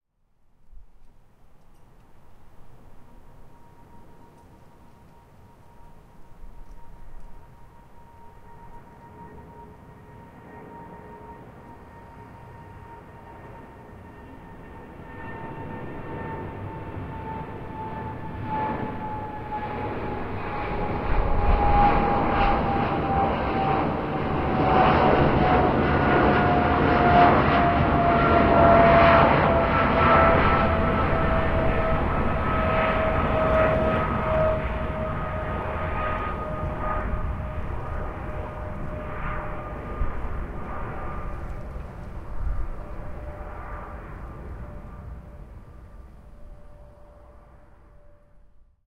Boeing 777F takeoff
Boeing 777 freighter taking off; engine type is General Electric GE90.